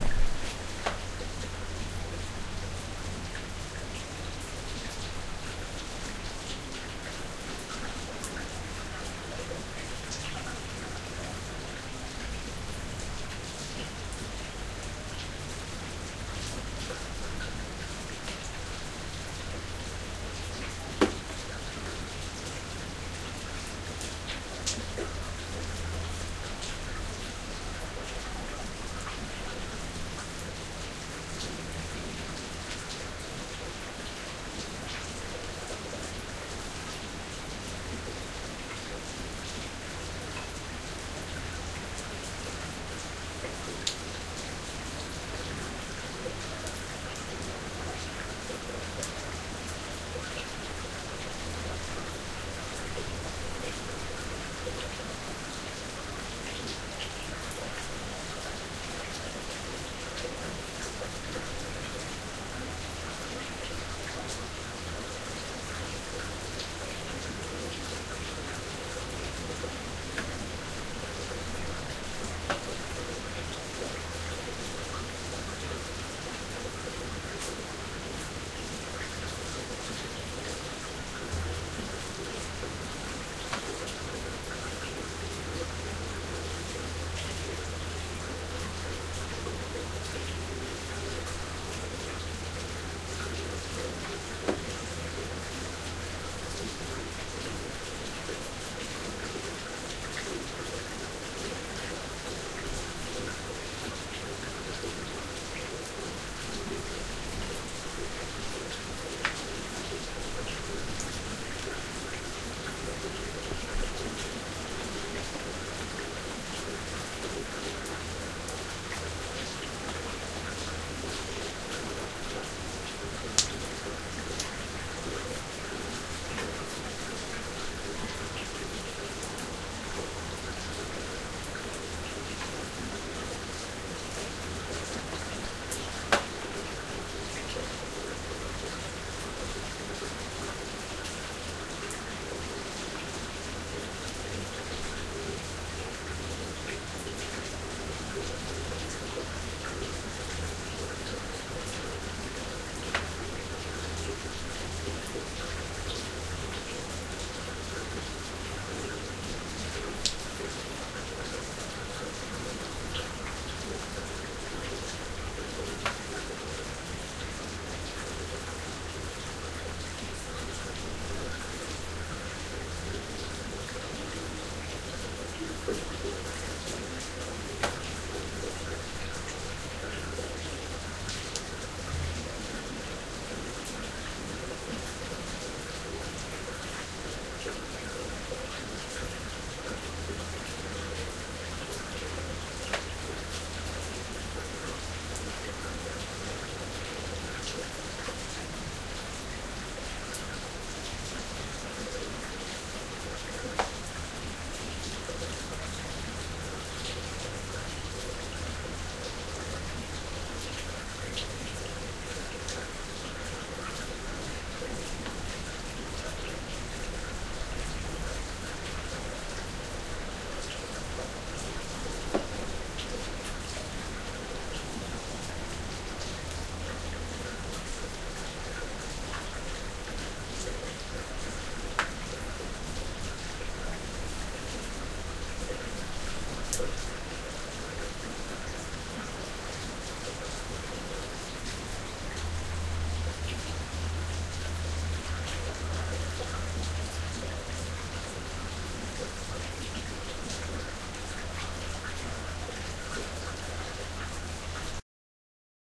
Rain on concrete at night
Rain falling on concrete at night
foley, nature, rain